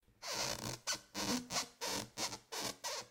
cartoon creak
cartoon style creak